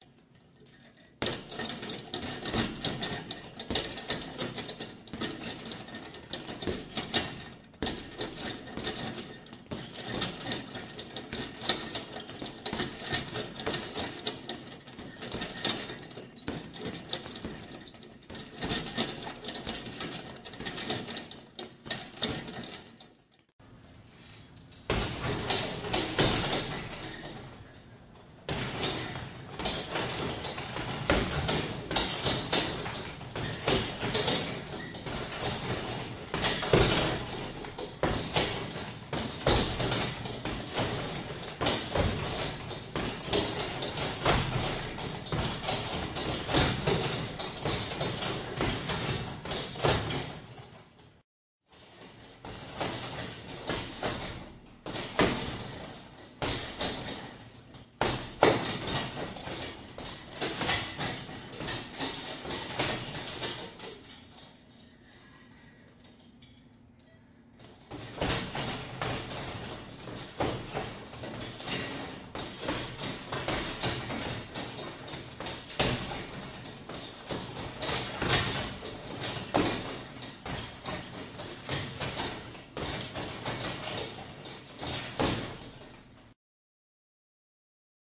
Boxing with a punching bag. Few different mic setups.
Punching-bag, H2n, Boxing, Chain